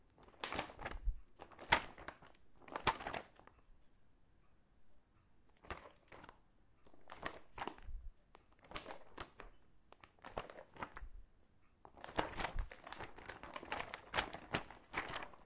paper stir
stir, paper, foley, movement